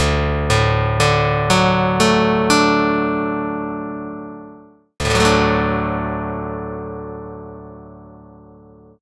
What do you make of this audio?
Open drop of bluegrass tuning for guitar (synthesized)
I had read that some bluegrass musicians tune their guitar strings to DADF#AD because it makes playing that style of music easier. But what does it sound like? This is the sound of each string being plucked and then an "open drop" strum, with no frets held down. It was synthesized using the program 'sox'.
If you have a UNIX machine (GNU/Linux, Apple MacOS) with sox installed, you can recreate the sound by running these commands:
delay 0 .5 1 1.5 2 2.5 remix - fade h 0 5 1 norm -1
delay 0 .05 .1 .15 .2 .25 remix - fade h 0 4 .1 norm -1
pluck, guitar, acoustic, sox, synthesized, strummed